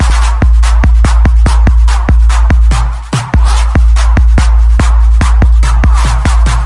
144 Bpm Loop break, 808 Kicks with Claps and effects
loop, 144, sub, breakbeat, 808, beat, break, bass